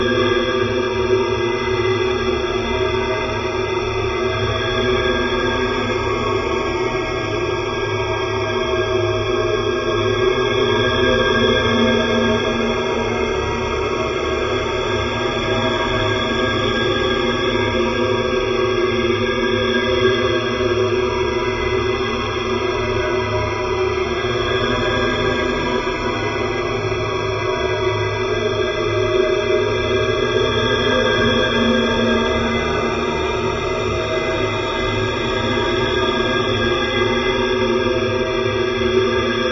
Synthetic ambiance reminiscent of planetary weirdness sounds from Star Trek, though it is not intended to emulate those. It is a relatively thick layering of multiple notch-pass filterings of noise using FFTs, with separate pitch bending and other effects in each layer. This isn't hard to do, but the complexity just means it takes a lot of time to get it done, so it's worth using the work someone else has done to save the time. Here's mine. :-) I can imagine this being used as a primary component (drone) of any other-worldly situation. Just add the sonic sprinkles of your choice. All components of this sample were created mathematically in Cool Edit Pro.
horror, ambient, background